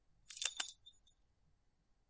Key get
The sound of keys jingling. Made using... Keys.
jingling, item, key, keys, pick-up